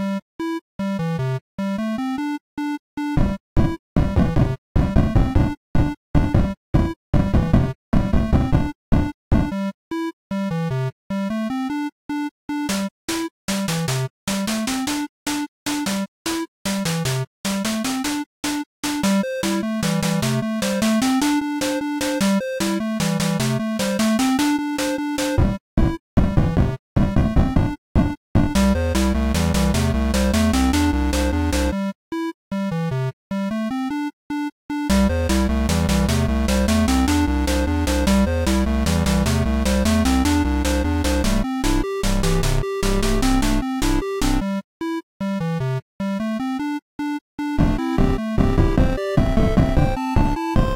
Pixel Song #6
Loop, Pixel